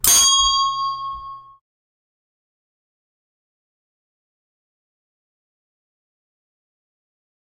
Single hit with the clapper on a handbell, compressed and equalized with the intention of creating a lift bell sound effect.
If you use this sound I would love it if you could send me a message saying what you did with it.
bell, ding, elevator, handbell, lift